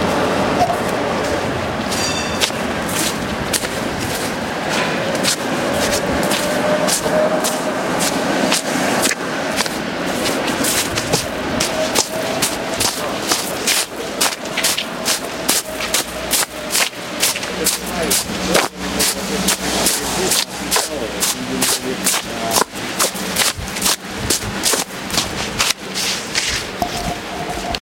chuze v ulici s frekventovanou dopravou na mokrem snehu
walking in the streets with busy traffic on wet snow
walk, footsteps, snow